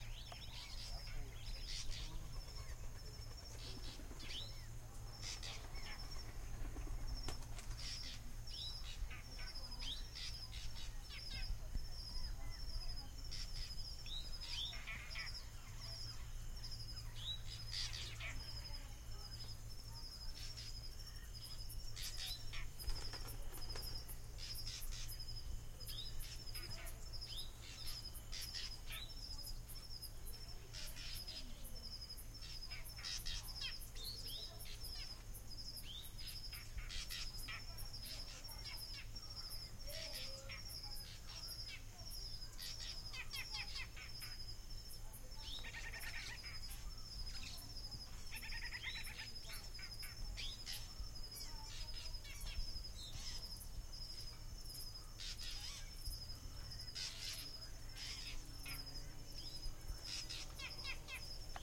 TascamDR-60 - 2016-10-12 at 00-32-34
Bird sounds from Zambia. Recorded with Tascam D60 and AT8022 stereo microphone